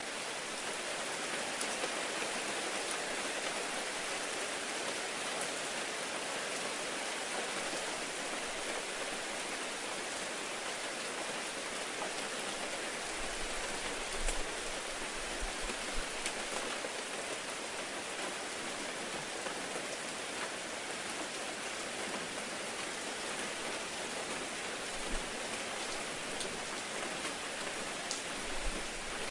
AMBIENT - Rain - Near Pavement (LOOP)
long loop of Rainfall under a Tree near a driveway.
Rain can be heard splattering on ashphalt and on tree leaves.
Somewhat muffled, subtle hints of wind shear on mic can be heard.
Recorded with Zoom H4 Handy Recorder
rainfall, rain, rumble, field-recording, sprinkle, weather, shower, nature, drainpipe, outdoors